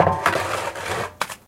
Jaeki's Concrete - Scrape (49)
A day at work, mixing and pouring concrete.
I sliced it all up just for u <3
140 one hitters including bonks, scrapes, crunchies and more
10 textures, loopables and regular field recordings
Enjoy!
credits
released March 1, 2022
All noises recorded by Jaeki
percs, drums, percussive, field-recording, textural